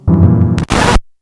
short chord and glitch
Casio CA110 circuit bent and fed into mic input on Mac. Trimmed with Audacity. No effects.
Bent, Casio, Circuit, Hooter, Table